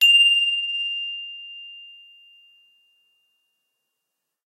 Single Chime 2
Another random single chime from garden set.
Low cut and X Noised for ya already.
Rode NTK mic.